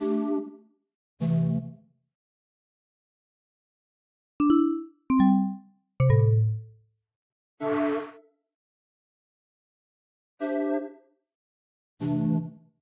Menu sounds
The sounds that I made and they sound like Playstation 3 and Discord